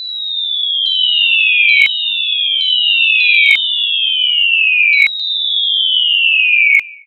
Vandierdonck Joan 2015 2016 fireworks
////////Theme
Fireworks
////////Description
Noise purely synthesized with Audacity.
On Audacity, generation of one sinusoidal "chirp" with linear interpolation which begins with a frequency of 4000Hz and an amplitude of 0,1, and which ends with a frequency of 100Hz with an amplitude in 0,1.
I also Changed tempo to make faster the sound.
We use this sound to create a loop where from the overlapping of the various sounds.
//////// Typologie
C’est un continu varié, la fréquence change graduellement au cours du temps
/////// Morphologie
Masse : groupe nodal
Timbre harmonique : son assez brillant
Dynamique : attaque plutôt graduelle, progressive
Profil mélodique : variation glissante
sky, light, rocket, party, noise, ambience, flame, night, firework